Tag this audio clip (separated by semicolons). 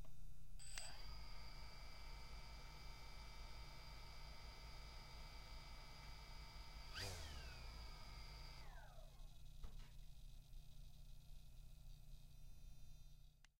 electric; helicopter-model; motors